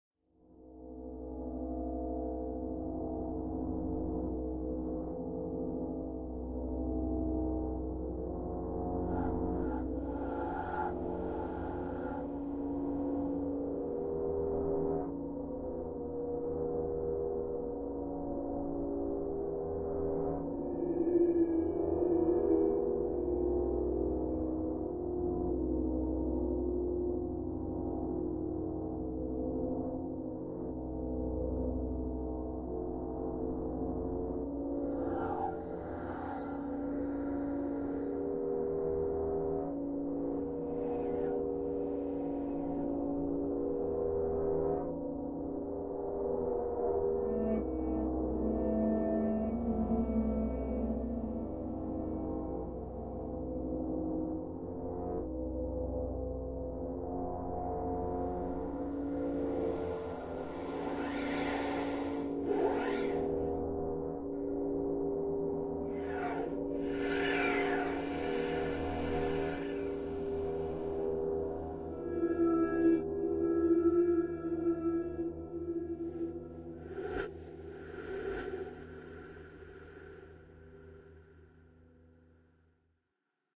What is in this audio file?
use electric guitar as a sound effect ... reverb and slow down..it is the remix of Dark02...
mix by Soundtrack pro